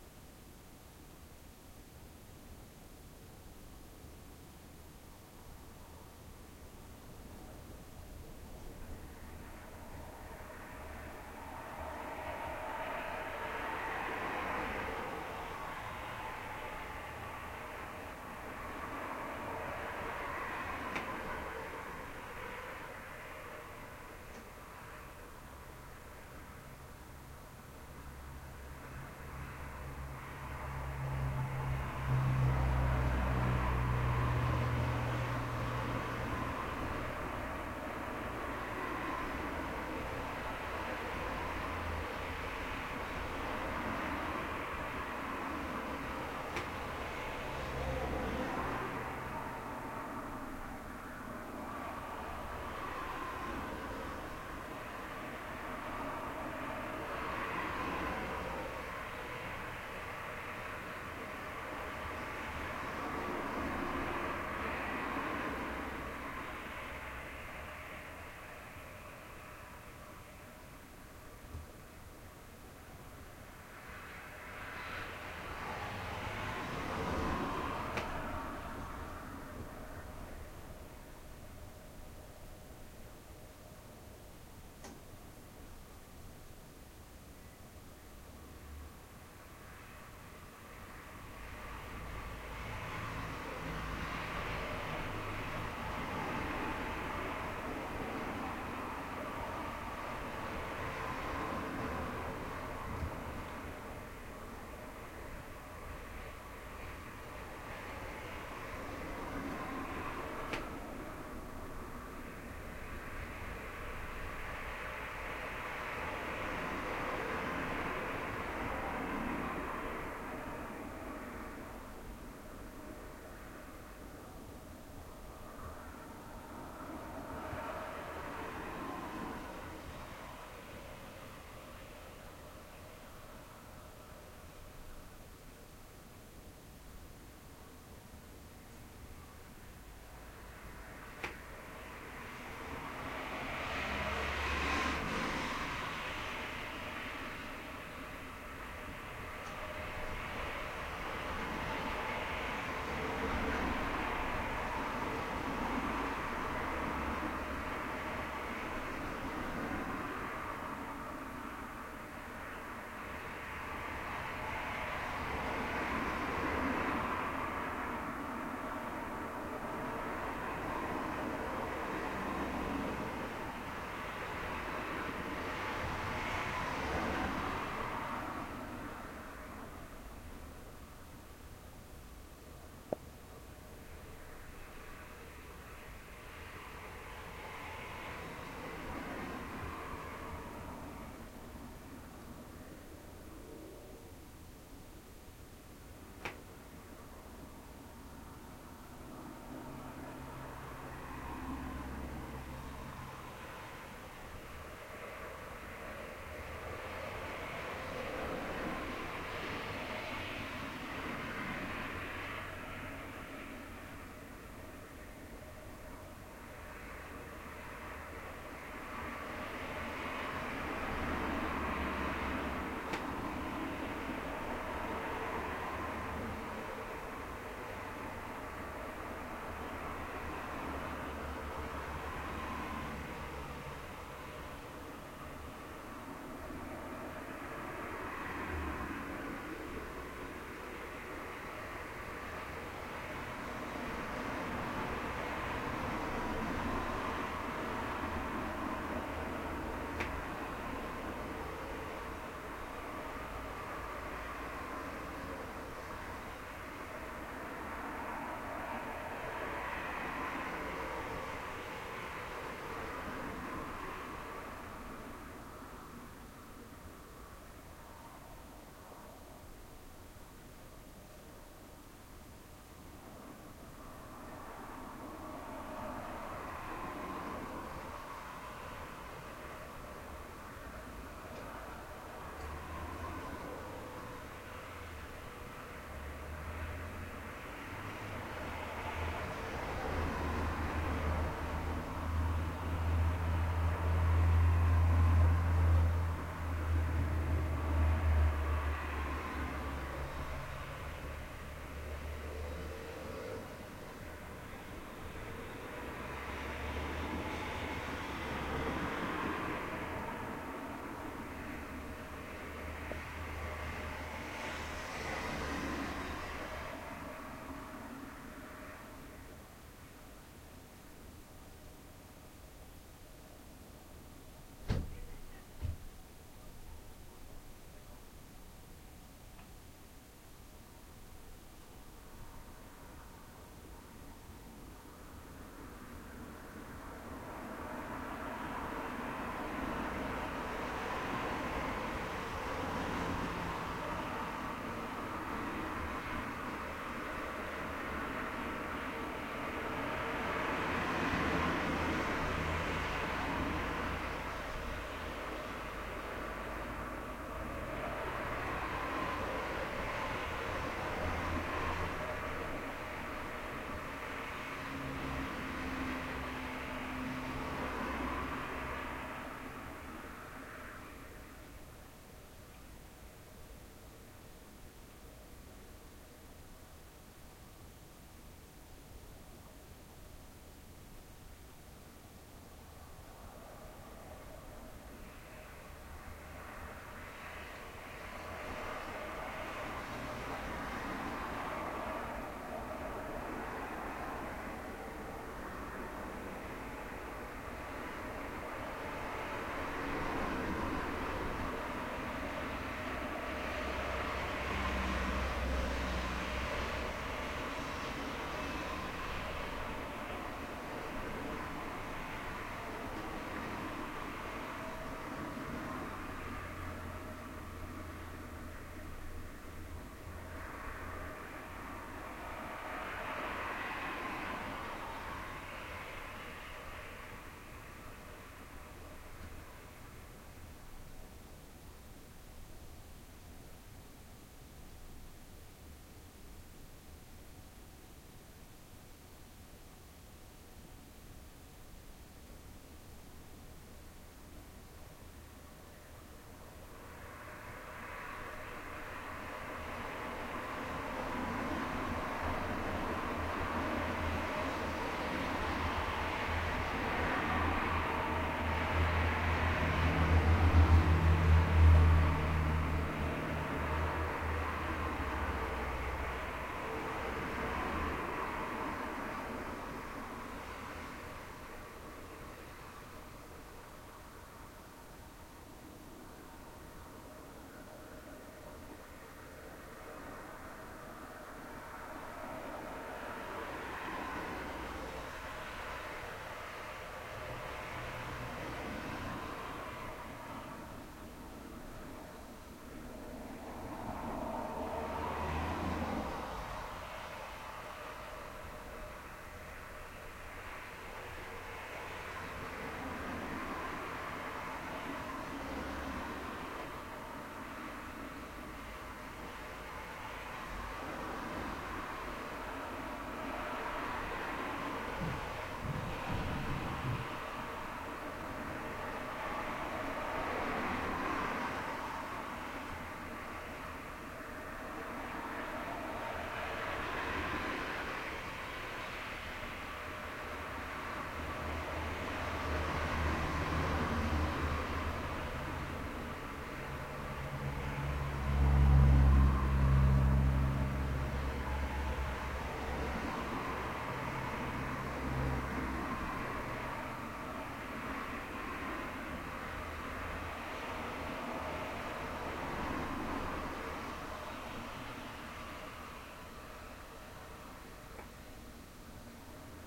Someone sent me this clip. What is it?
This was recorded from a room on the 2nd floor, behind closed windows. The windows were only one layered glass, ie. not thermo, but old fashion non insulated windows, so the sound from the traffic in the street, are quite a bit stronger, than it would be with the more recent argon/gas insulated double layered windows.
The recording was made just around noon on a sunday, so the traffic is not heavy.
Recorded with a zoom h2